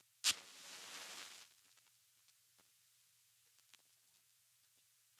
match recorded with one dynamic microphone and "stereo" processed in sony sound forge
match, fire